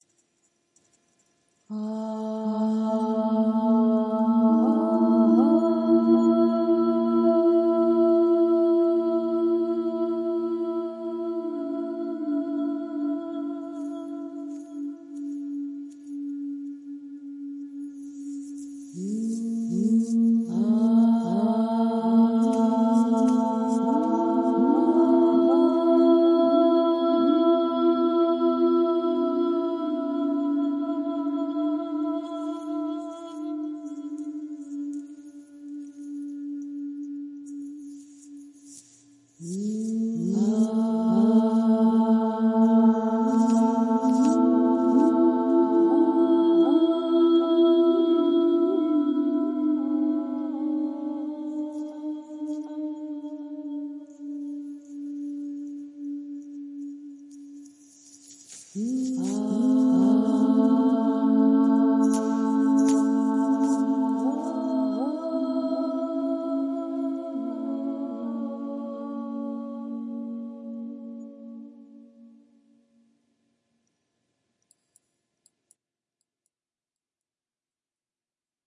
Ethereal Voices
Three layers of me singing. Good for meditations and soul alignments. Use it to create more beauty in this world :-)
ambiance, ambience, ambient, atmosphere, background, background-sound, beauty, dream, dreamy, meditation, phantom, relaxation, soundscape